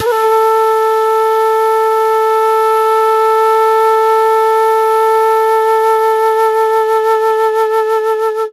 Flute Dizi C all notes + pitched semitones